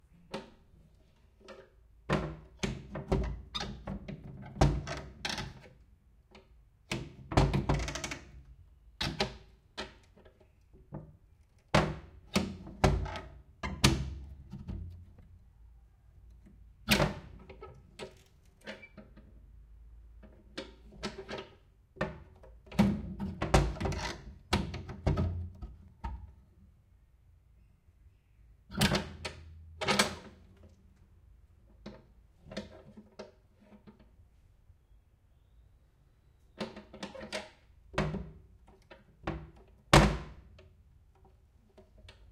window with heavy metal frame latch open close creak squeak various
close, creak, frame, heavy, latch, metal, open, squeak, window